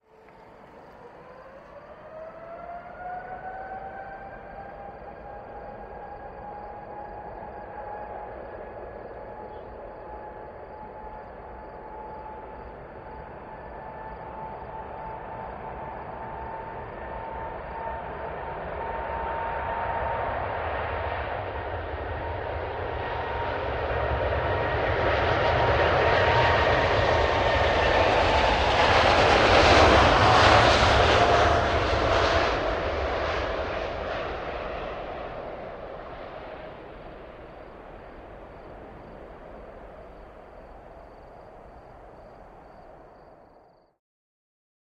Takeoff of a B747 at FRA west.
Zoom F8, Røde NTG4, Blimp